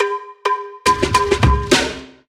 wafb fill acoustic 105 bellboy
acoustic, fills, sound-effect